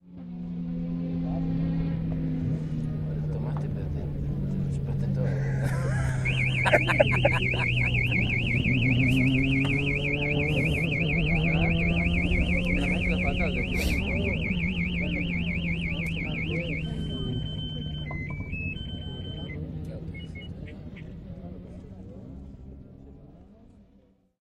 TC2000.08.Cabalen.TeChupasteTodo
Diego + siren + cars + wine + me = ….Quedo Vino?
siren, voice, laughter, race, sound, zoomh4, field-recording, car, alarm, male